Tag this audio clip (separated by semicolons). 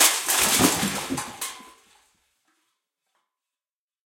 bin; bottle; c42; c617; can; chaos; coke; container; crash; crush; cup; destroy; destruction; dispose; drop; empty; garbage; half; hit; impact; josephson; metal; metallic; npng; pail; plastic; rubbish; smash; speed; thud